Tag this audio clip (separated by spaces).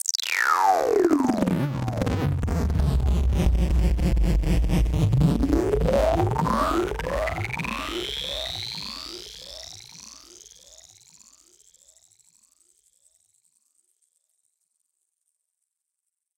acid sfx weird fx sound-design sweep synth sci-fi future freaky electronic